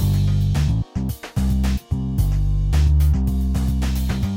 Vexst SoFunky 11OBPM New BasSiE Again, Amigo
A beat with a very funky bassline. Just fooling around more with VEXST's wonderful snares.